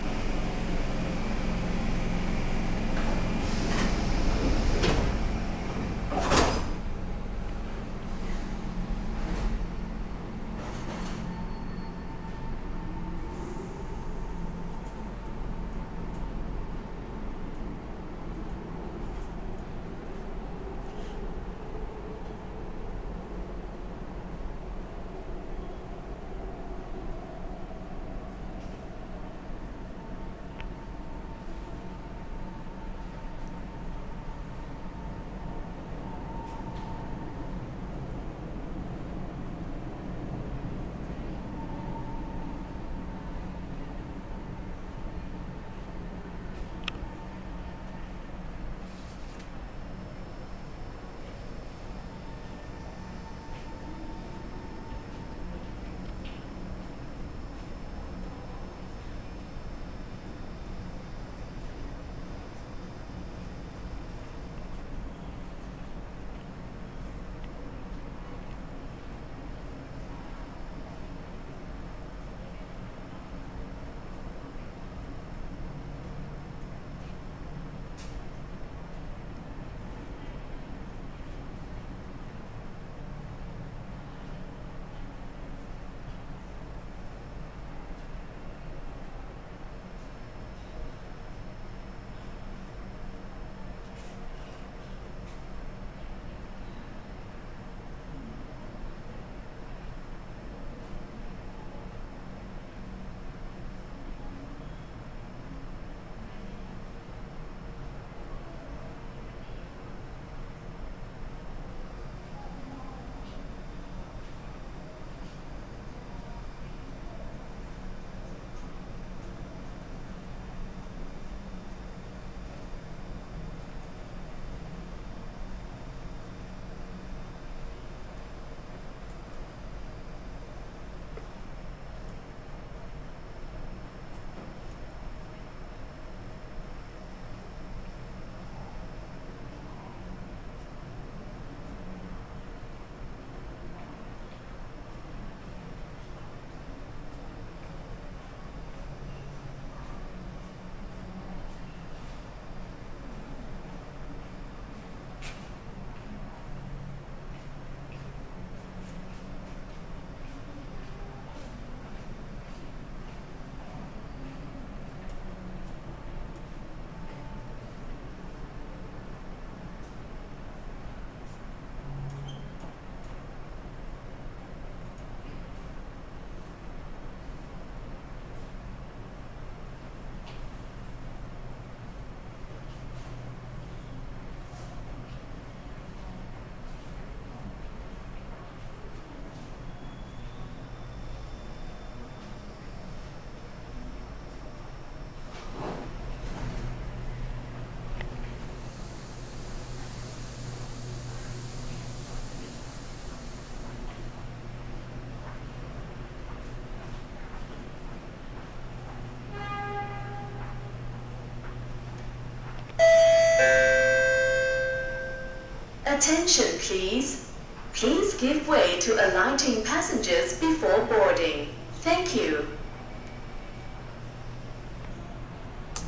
Sound of doors closing and waiting in the station for the next train, at the end is the announcement:
"Attention please. Please give way to alighting passengers before boarding. Thankyou."